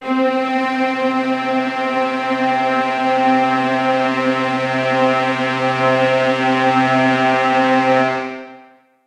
Spook Orchestra A3
Spook Orchestra [Instrument]
Spook, Orchestra, Instrument